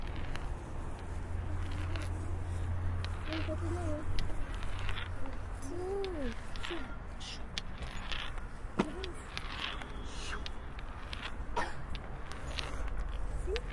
Sonic snaps CEVL Stones
Field recordings from Centro Escolar Vale de Lamaçaes and its surroundings, made by pupils.
aes, Fieldrecordings, Lama, Sonicsnaps